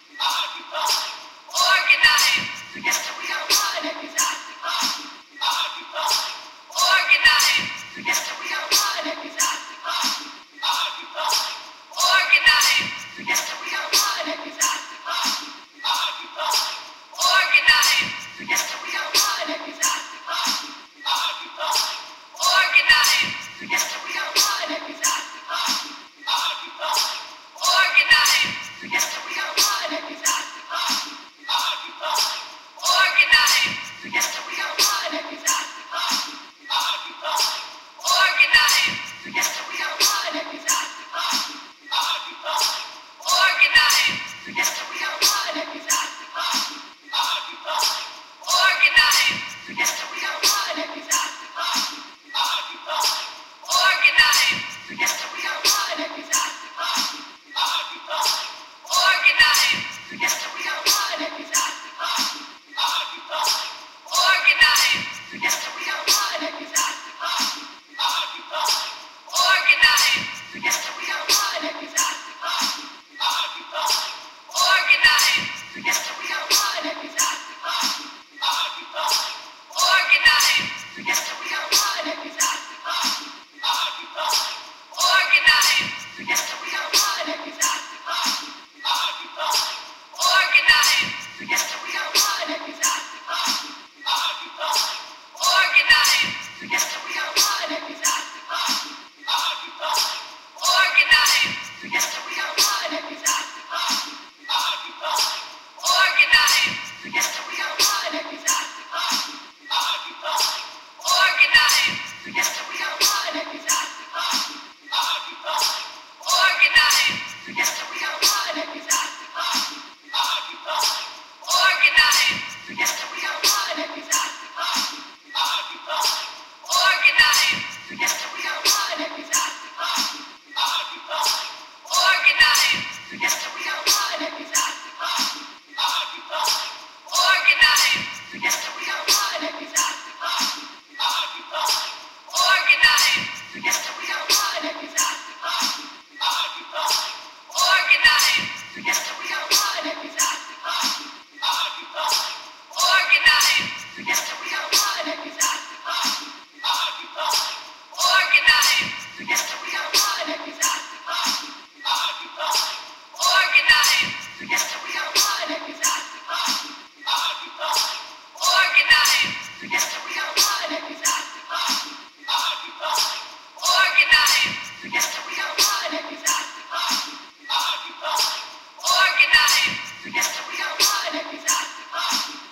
picked one chant, filtered and looped up to 3min.